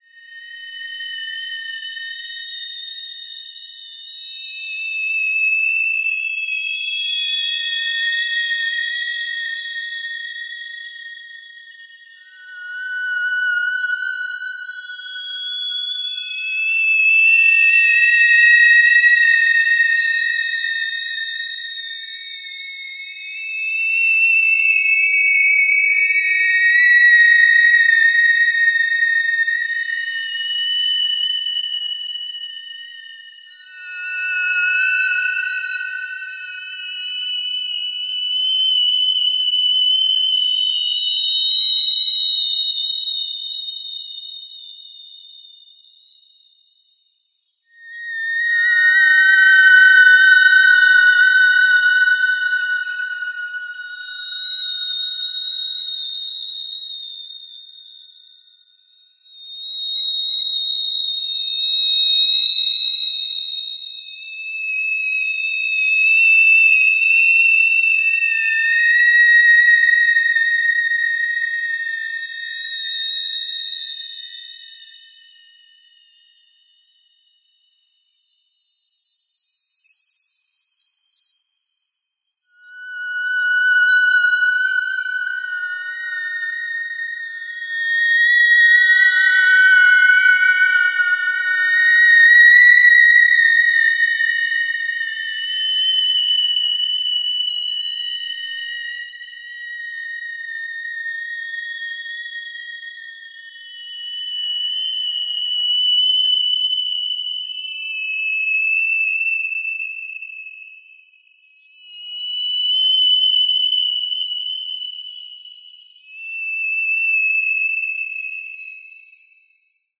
This sample is part of the "Space Machine" sample pack. 2 minutes of pure ambient deep space atmosphere. Quite melodic and thin, mostly high frequencies.